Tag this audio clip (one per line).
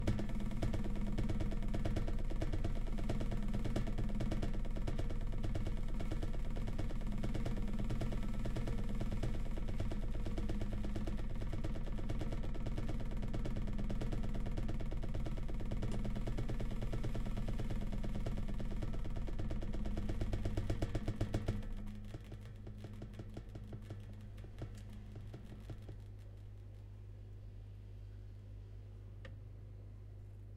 by
caused
flapping
pot
resonance
temperature